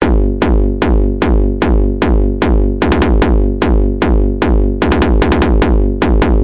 Hardstyle kick
hardcore, kick, electronic, hardstyle